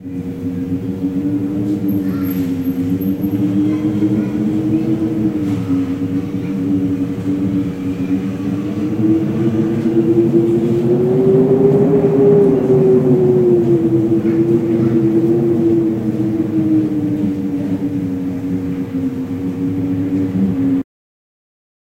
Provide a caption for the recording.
viento fuerte
wind strong air
air, strong, wind